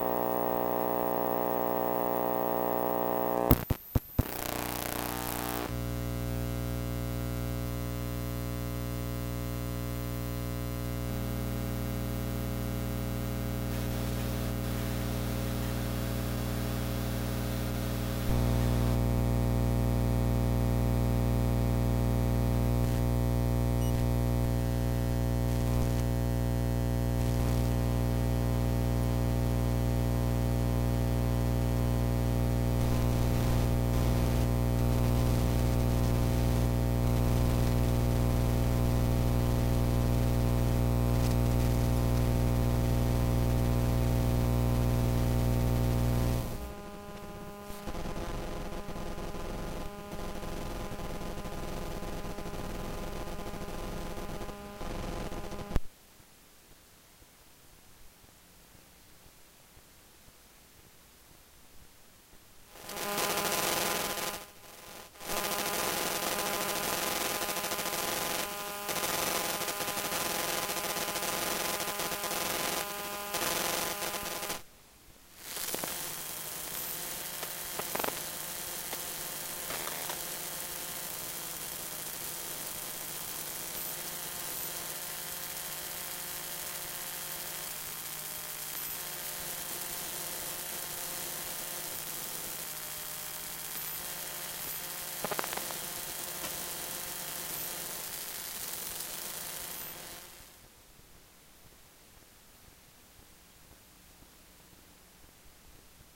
Phone transducer suction cup thing on various places on the laptop while running, opening windows, closing windows, etc.
buzz, electricity, electro, hum, magnetic, transducer